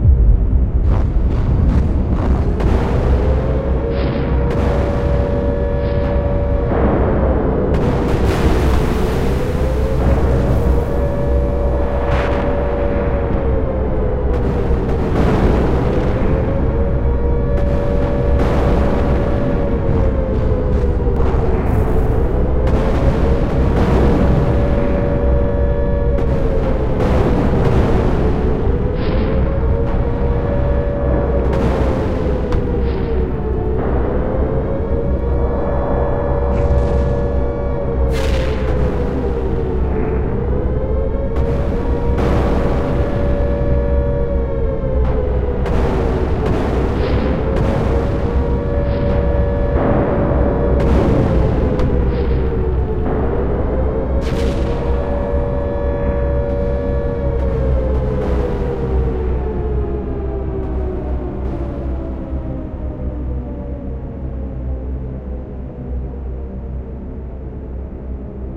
Air Raid Soundscape
raid, cater, soundscape, air, siren, bombing, attack, planes